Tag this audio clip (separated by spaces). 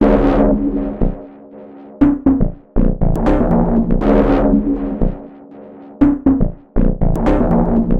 minimal 120bpm experimentl loop drumloop